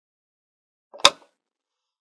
lights off
off, press, room, simple, switch, switches